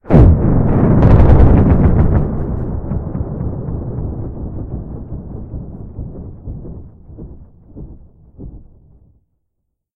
Some kind of rapid-fire pulse cannon.
cannon, drop, laser, sci-fi, shot, weapon